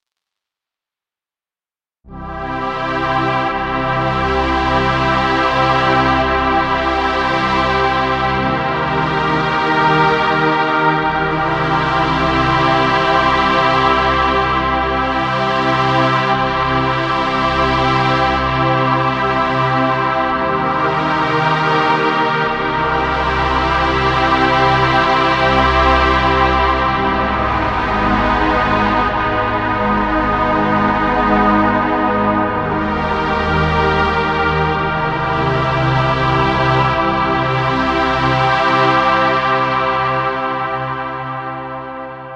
ancient addventure music by kris klavenes
hope u like it did this on keyboard
loops, a, Addventure, ancient, music, samples, sad-music